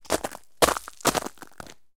footsteps - ice 03
Walking on a pile of ice cubes while wearing mud boots.